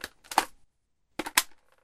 Recorded from a steyr aug airsoft gun. Reload sound in stereo.
aug, gun, airsoft, reload, plastic, click, load, magazine, rifle